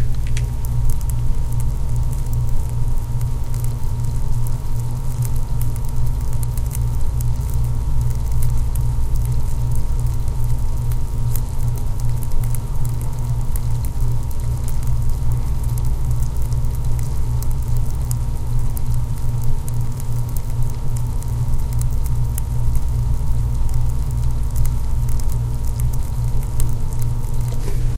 tadpoles rawnoisy
Raw recording of sounds of tadpoles making bubbles recorded with Olympus DS-40 with Sony ECMDS70P.